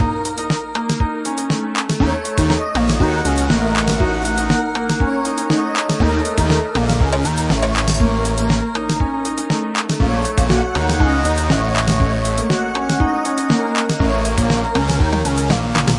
short loops 26 02 2015 5
made in ableton live 9 lite
- vst plugins : Alchemy
- midi instrument ; novation launchkey 49 midi keyboard
you may also alter/reverse/adjust whatever in any editor
gameloop game music loop games dark sound melody tune techno pause
dark, game, gameloop, games, loop, melody, music, pause, sound, techno, tune